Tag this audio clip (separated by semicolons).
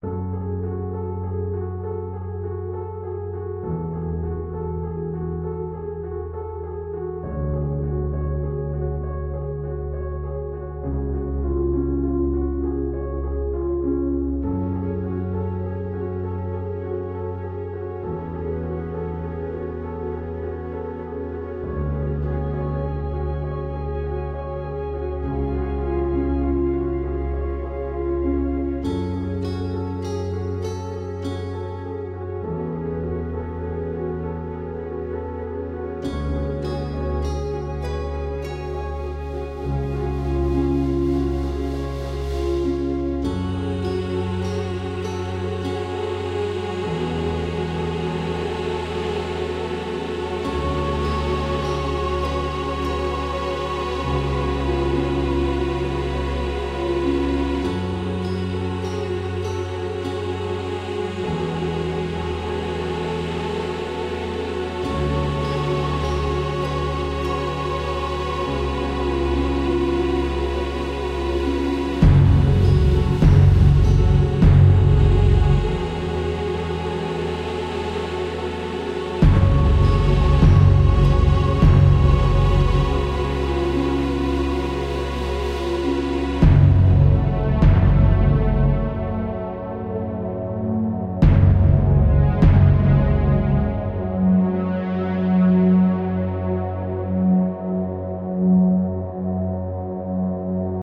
cinematic; Classic; Classical; dark; Piano